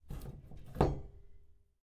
Doorbell Pull with Bell Store Bell
Old fashioned doorbell pulled with lever, recorded in old house from 1890
Doorbell, Pull, Store